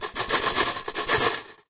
Rhythmic swirling of glass mancala pieces in their metal container.